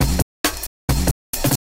processed with a KP3.